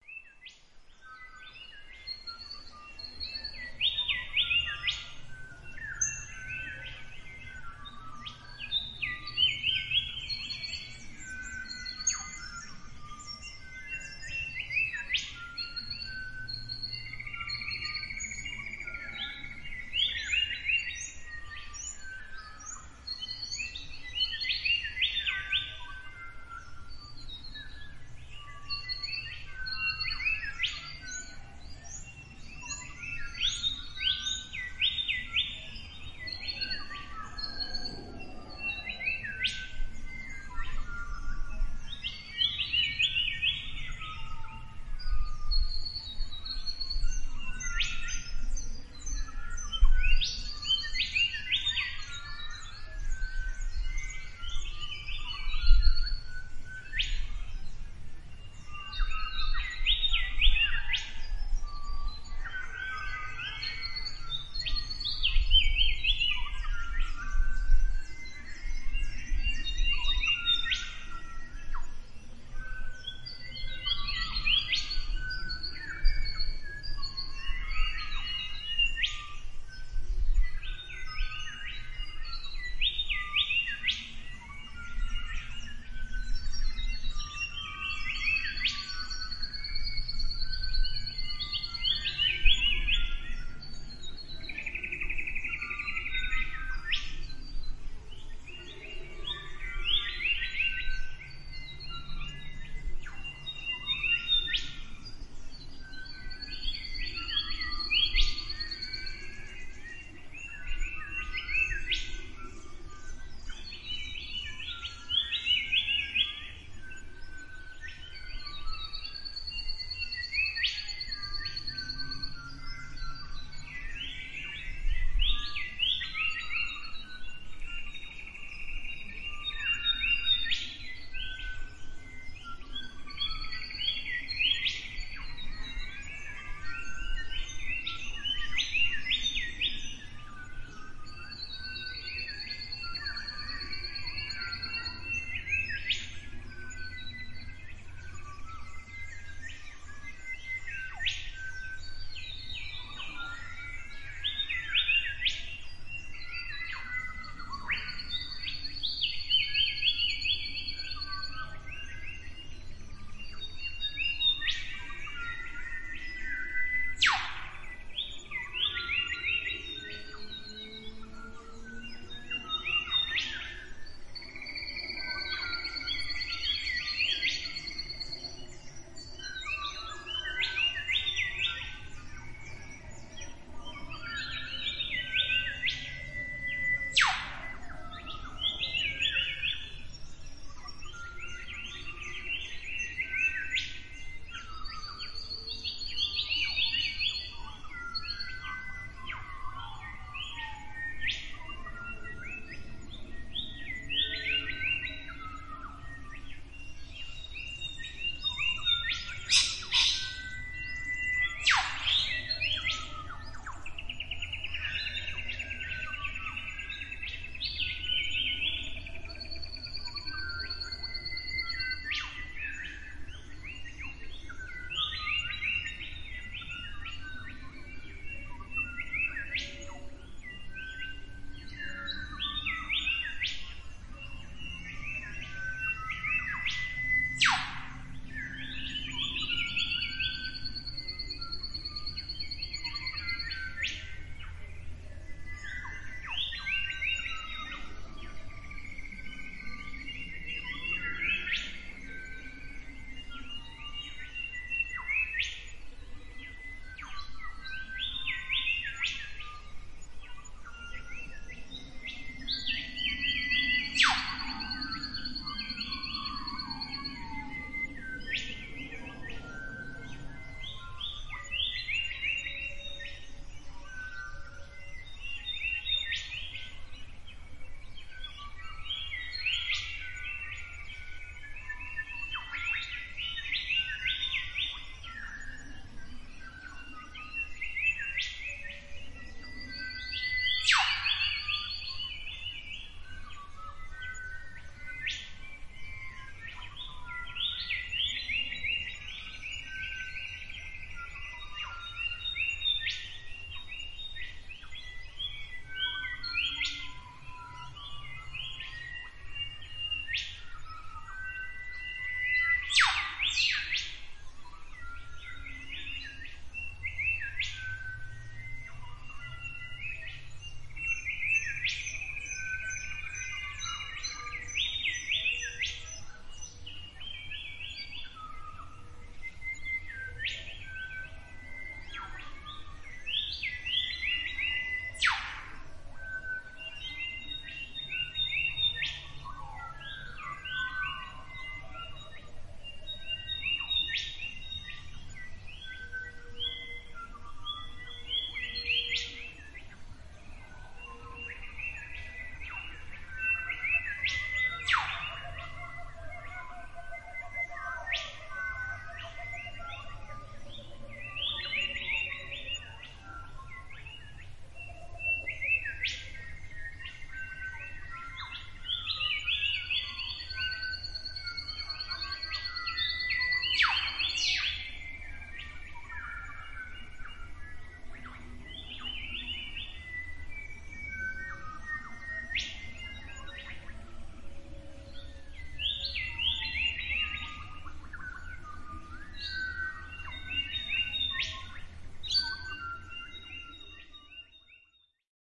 Morning birds 31-8-09 Eumundi
Recorded on a Zoom H4, inbuilt X-Y mics, at 6am, in Eumundi, Sunshine Coast Hinterland, Queensland. No editing. Longer version (32 minutes) available if you would like it. Free to enjoy.
Australian-birds, field-recording, nature